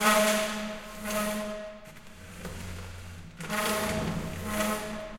14 Moving school desk
Moving school desk
desk, school